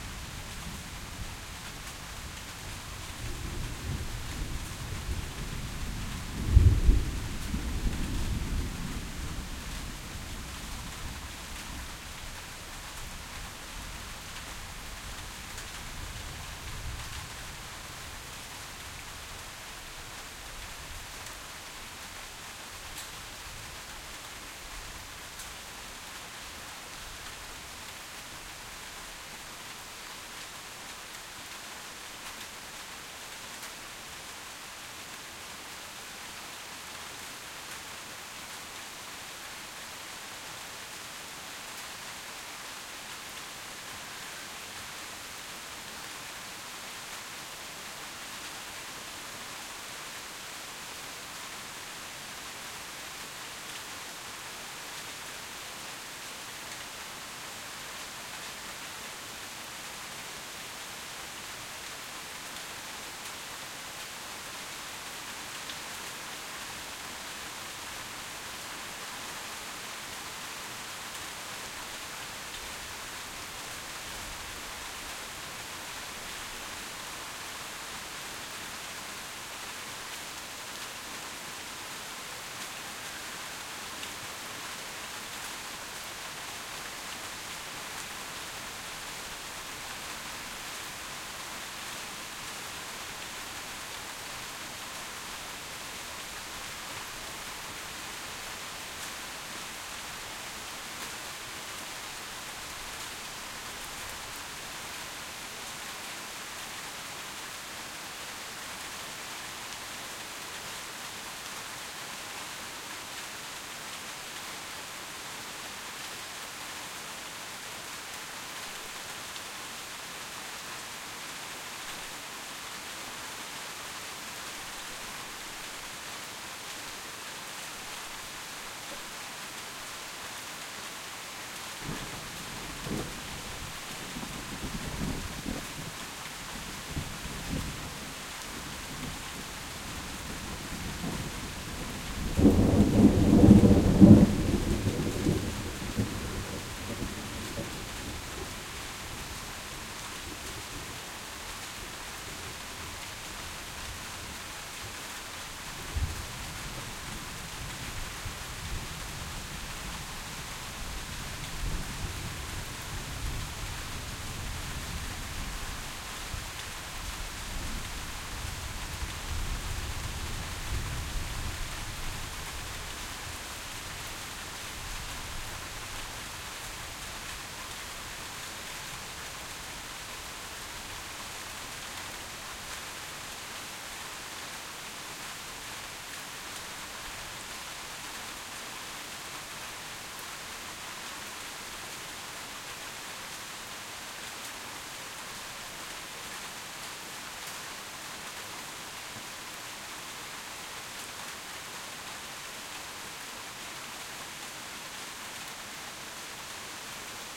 Raining and Thunder in stereo 2
Rain on tin roof with thunder.
Recorded using Zoom H5 and XYH-6 Mic
stereo, roof, tin, Raining, Thunder